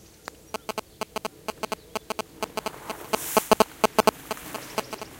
20060425.cellphone.interference
characteristic interference caused by a cell phone near the mic / tipica interferencia de telefono movil cerca de un microfono
cell-phone, interference